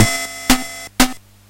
"waltz" drum pattern from Yamaha PSS-170 keyboard
yamaha, waltz, 80s, retro